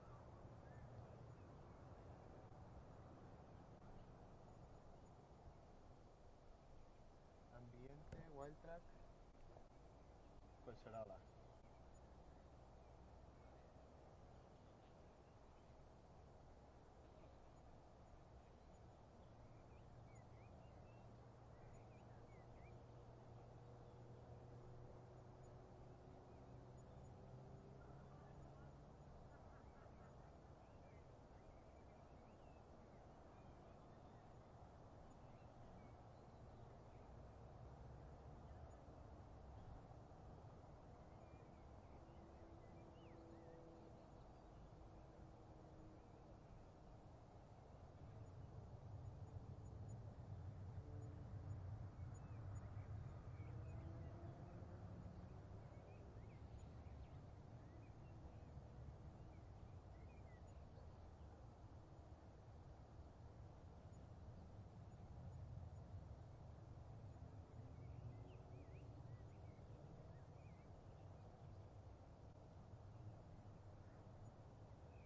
WT ambiente montaña Collserola
Ambient de la muntanya de Collserola. Barcelona.